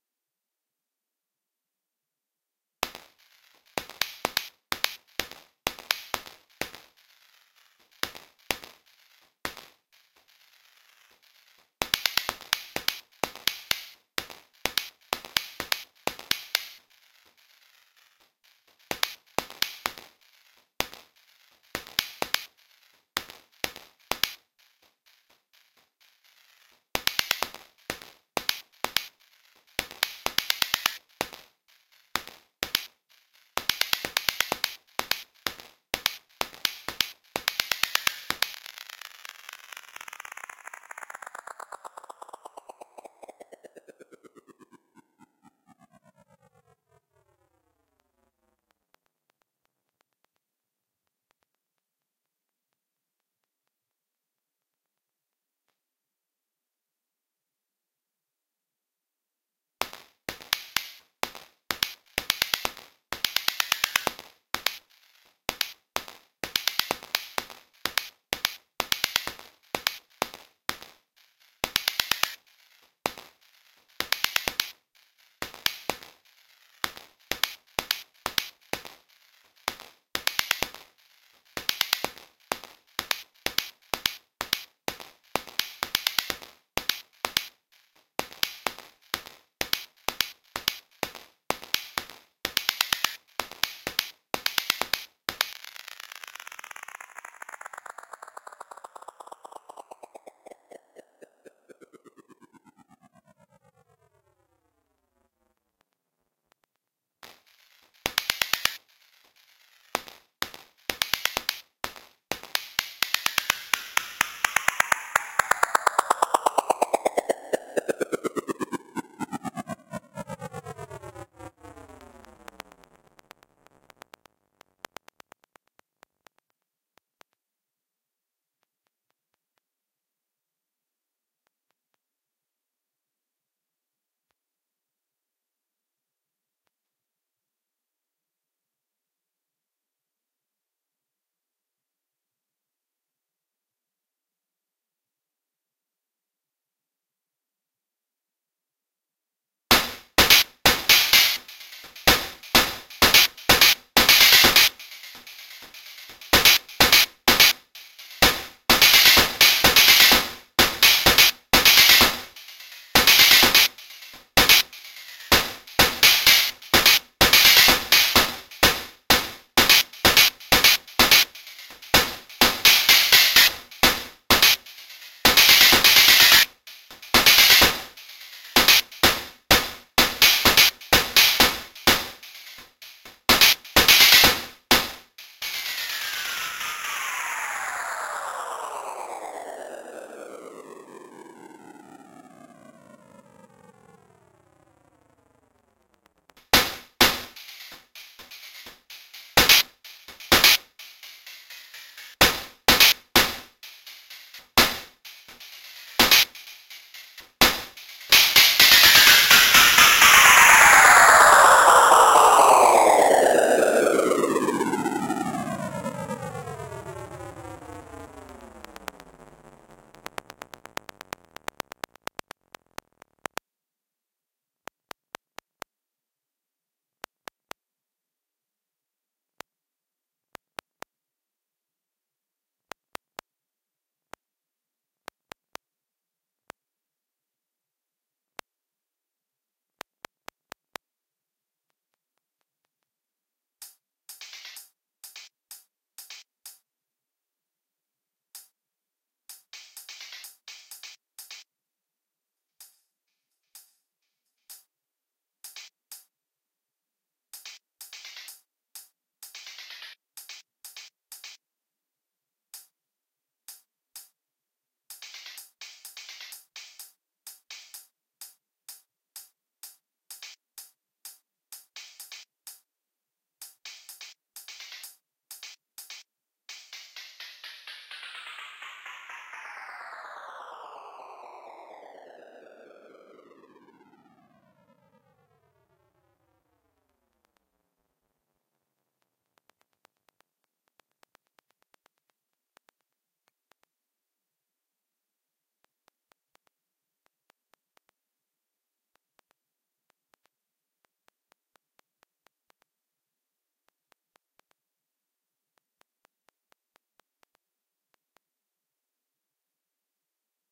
While fooling around with the Beat Repeat plugin in Ableton one day, I noticed it would do this cool rhythmic decrescendo whenever I would hit "Repeat".
But not when I would export! So I just kept messing around with a simple hi-hat loop with Audacity running in the background.
I've cut down and sampled this recording many times, think it's time I let it go to see what the Internet can do with it ;)